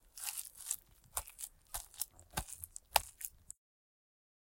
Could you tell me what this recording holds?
Squish from a pot of overcooked rice and a spoon.
Foley, Gross, Slosh, Squish, Wet